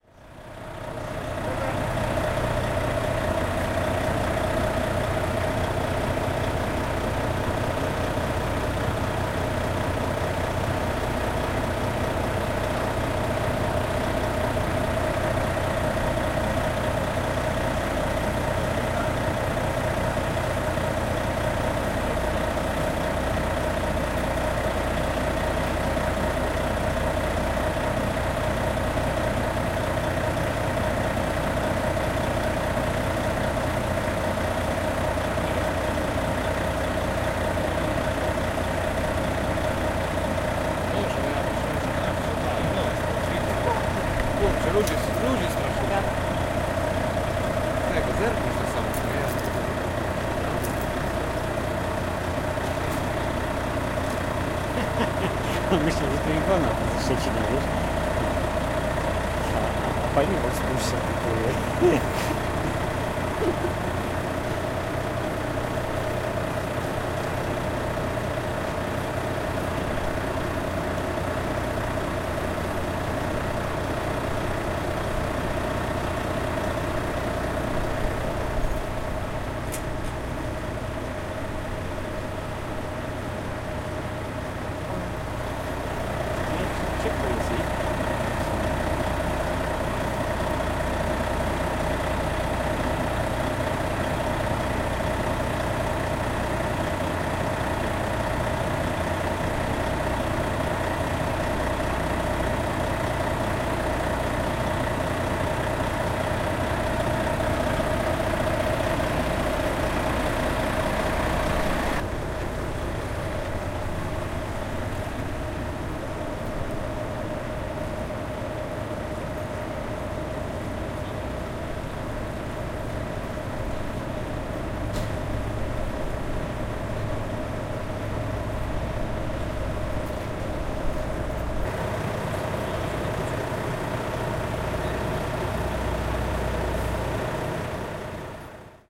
baza tiry1 310711
31.07.2011: about 11 pm. the international logistic company base in padborg. the first day of my ethnographic research on truck drivers culture. sounds of whirring trucks, some talks between truck drivers and sizzling of the electricity pylon in the bacground.
danemark, drone, electricity, field-recording, nihgt, noise, padborg, people, pylon, sizzle, truck, truck-drivers, voices, whirr, whirring